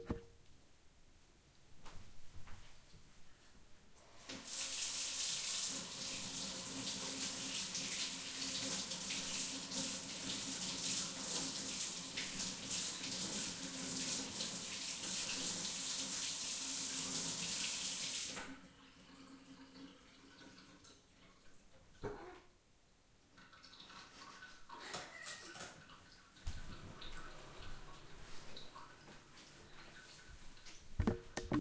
washing up scissors 01
Walking to the other side of small hard surface room, switching on tap and washing scissors in a metal sink under the slow running water. Turns of tap and returns.
Recorded with H4N Zoom.
Hairdresser, salon, scissors, washing